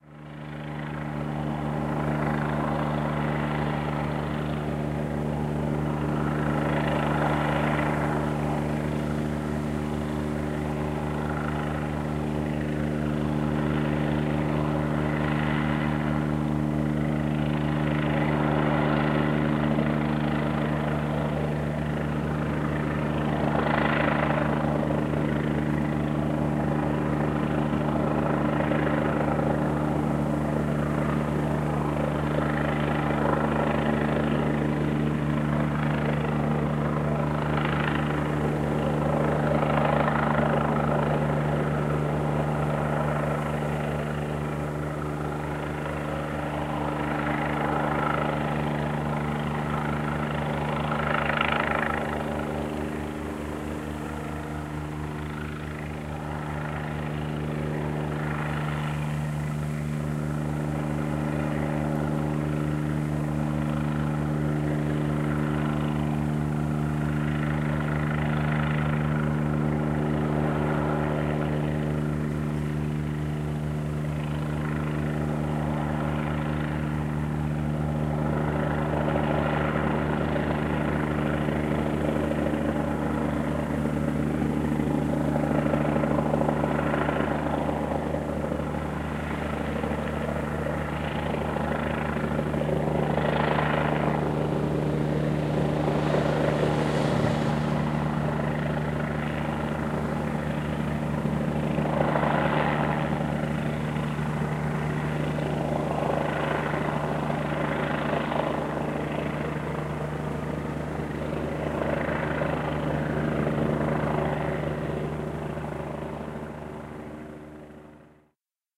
Helicopter operation at night
chopper, propellers, fly, hubschrauber, Helicopter, wing, flying, night, aircraft, flight, police